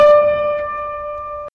Part of a series of piano notes spanning one octave.
notes, note, keyboard-note, d, piano-note, piano, keyboard, piano-notes, keyboard-notes